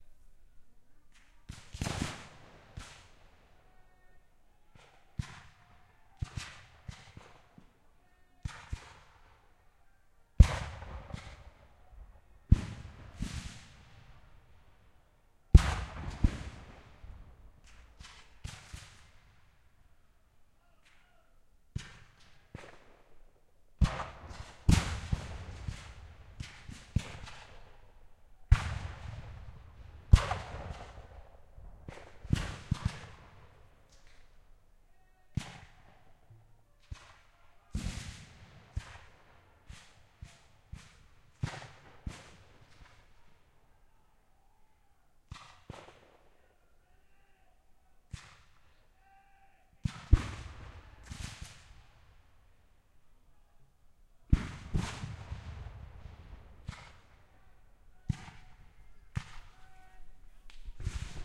Rifles and Cannons, Farther Off
Recorded at a US Civil War re-enactment, Oregon, USA, 2012. Black powder rifles, cannons, almost a full battle. Lots of range. Recorded about a football length from the action with a Tascam DR-08.